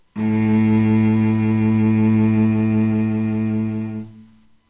A real cello playing the note, A2 (2nd octave on a keyboard). Tenth note in a chromatic C scale. All notes in the scale are available in this pack. Notes, played by a real cello, can be used in editing software to make your own music.